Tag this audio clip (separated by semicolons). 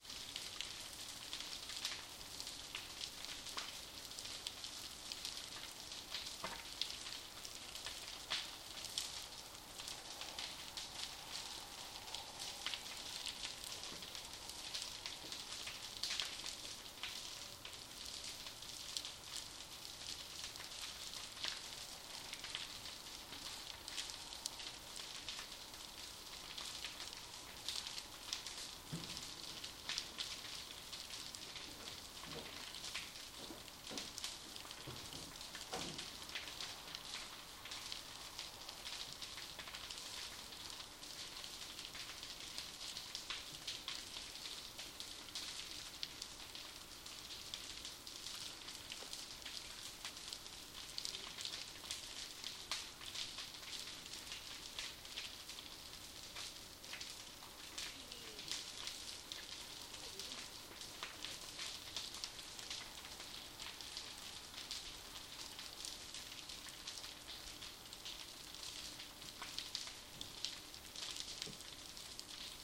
ambiance; craclke; atmosphere; sparks; background; crackling; fire; flames; burning